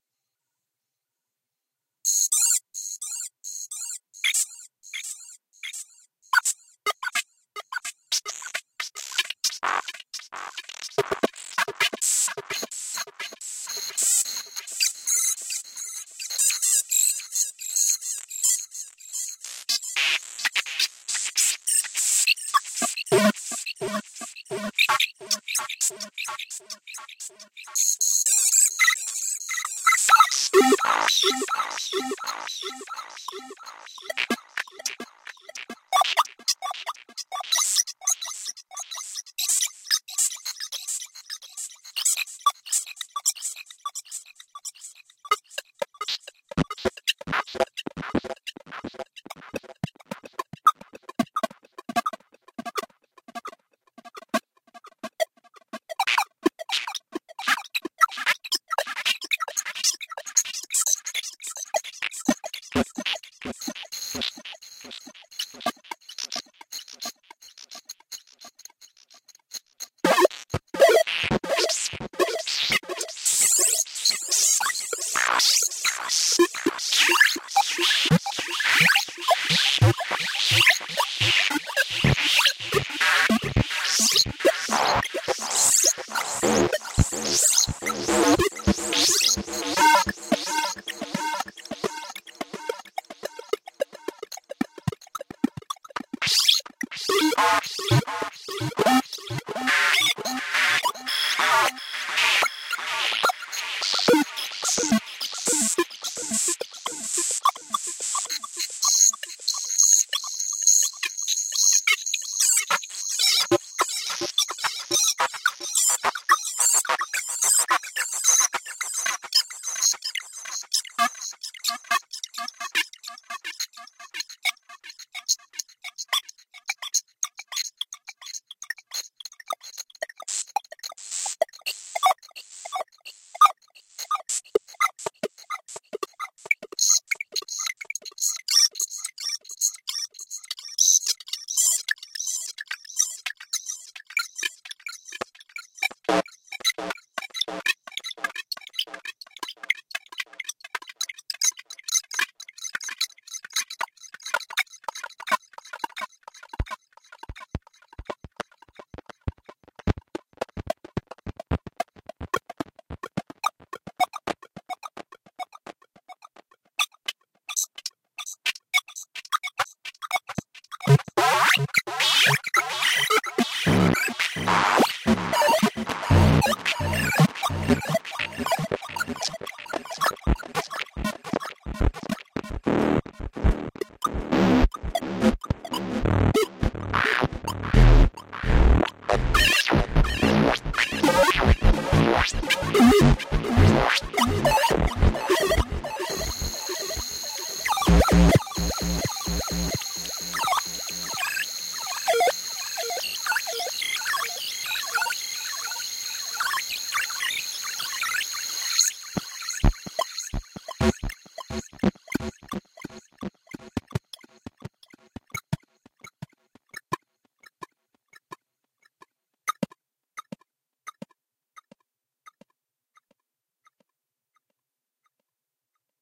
This is part of a series of experimental synthesized tracks I created using a Korg Kaoss Pad. Performed and recorded in a single, real-time situation and presented here with no added post-production.
The KAOSS PAD lets you control the effect entirely from the touch-pad in realtime. Different effect parameters are assigned to the X-axis and Y-axis of the touch-pad and can be controlled simultaneously, meaning that you can vary the delay time and the feedback at the same time, or simultaneously change the cutoff and resonance of a filter. This means that complex effect operations that otherwise would require two hands on a conventional knob-based controller can be performed easily and intuitively with just one hand. It’s also easy to apply complex effects by rubbing or tapping the pad with your fingertip as though you were playing a musical instrument.